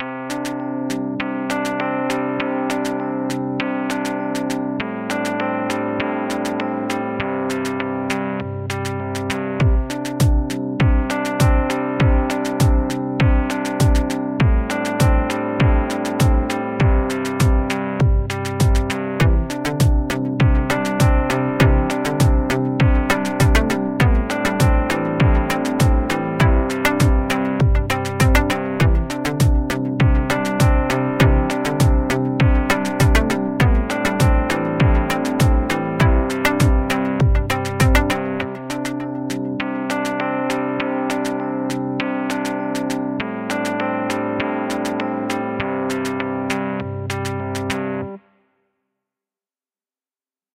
Nodens (Field Song)
This is a short loop made for a video game. A relaxing but lighthearted piece that I imagined for a menu screen or on a field/park/cutscene of some sort. It doesn't strike me as something suitable for motion, but it is available for any use.
game, music, sample, video